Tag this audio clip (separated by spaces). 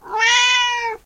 cats miaou meow cat miau